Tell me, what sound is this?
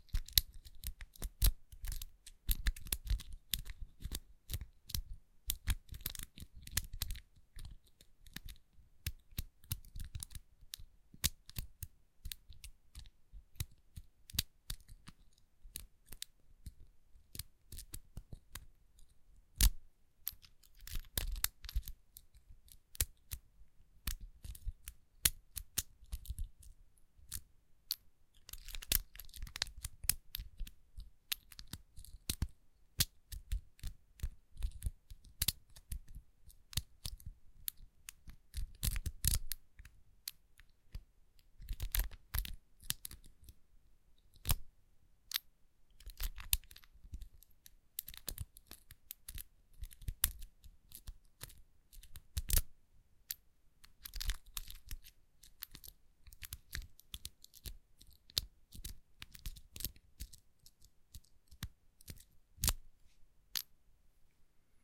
Lock 2 - Lock Picking
Pad lock being picked
close, closing, door, gate, key, keys, lock, locking, locks, open, opening, padlock, pick, picking, shut, unlock, unlocking